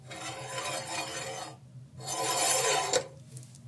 Brushing Against Metal
brush, impact, steel, blade, percussion, clank, ting, scabbard, metal, ring, bell, sharpen, shing, sharp, knife, scrape, metallic, hit, clang, sword